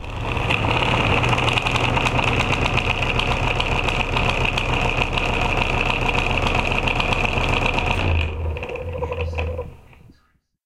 Crunching Sounds of Gears Flickering
Some sort of handcracked gear system. I cannot recall the exact place this was recorded, however, the sample is neat.
sound, wheels, effect